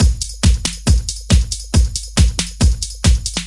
drum loop 138 bpm
03, loop